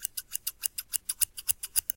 Small scissors recorded with radio shack clip on condenser.
scissors, household, percussion, loop